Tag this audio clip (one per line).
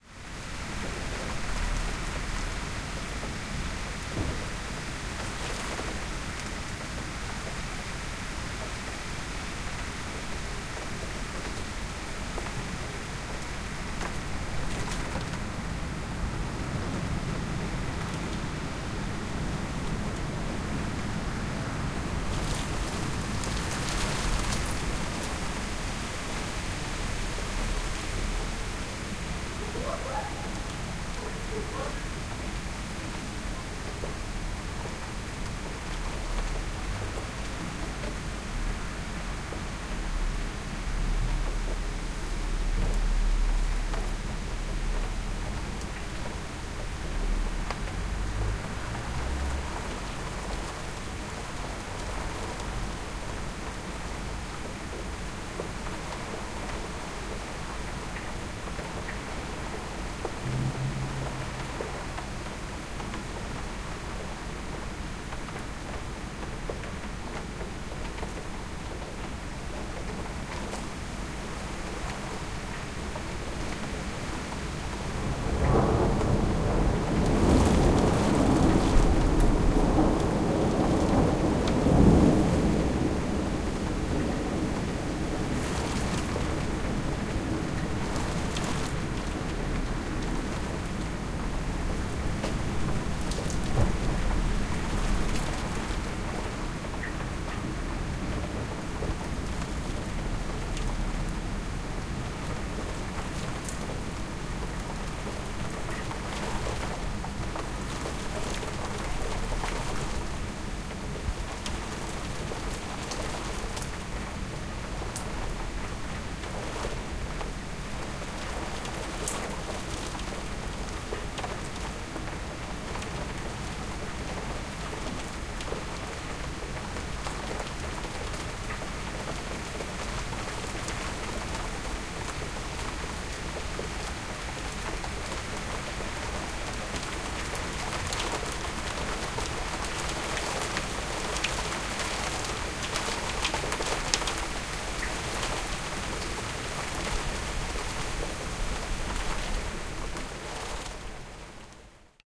inside
rain
storm